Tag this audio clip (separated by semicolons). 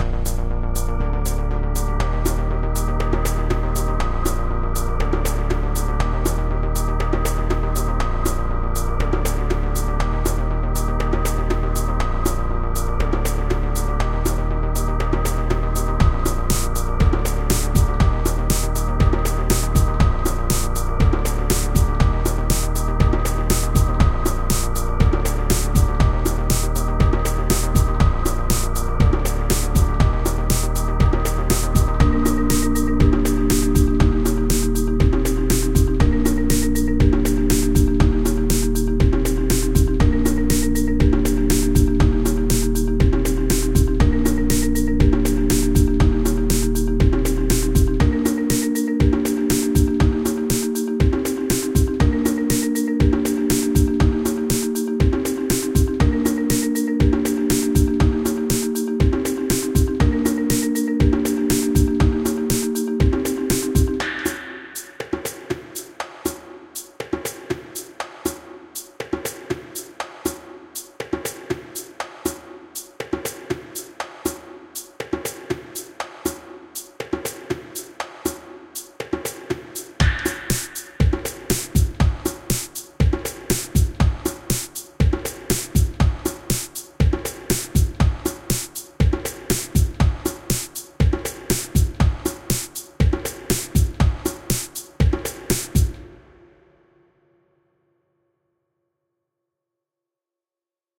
synth; percussion-loop; improvised; ambient; rhythmic; sad; 130-bpm